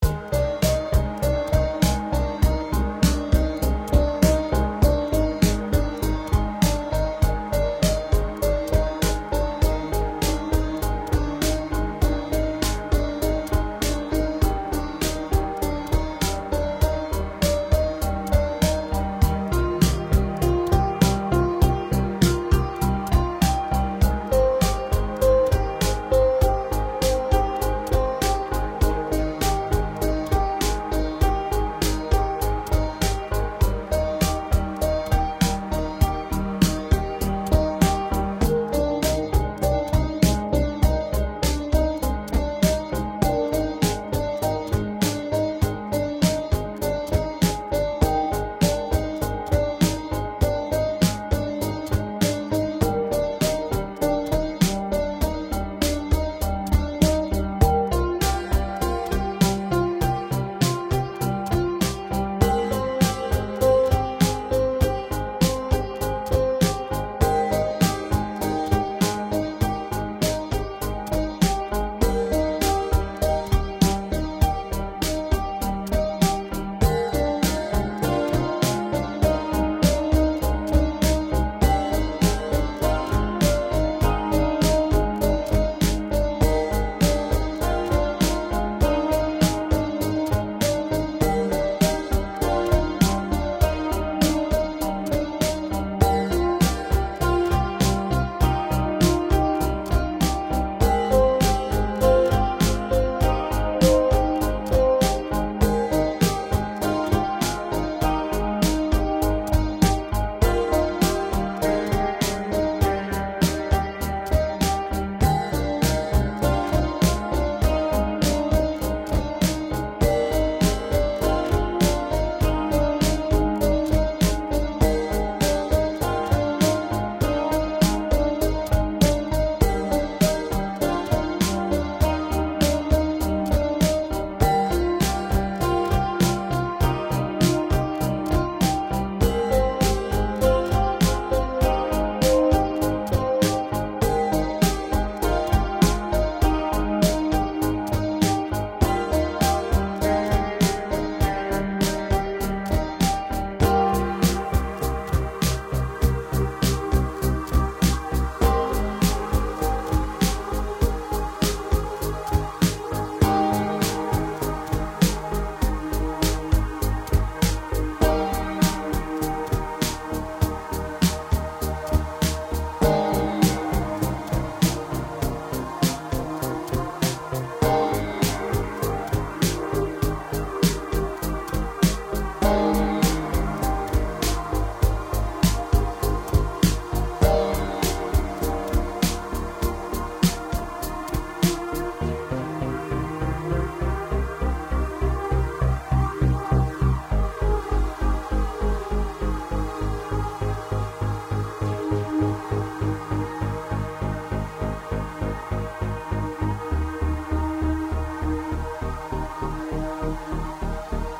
techno pop ambience-piano loop 001
Techno pop ambience-piano loop.
Synths:Ableton live,Silenth1,Kontakt,S3.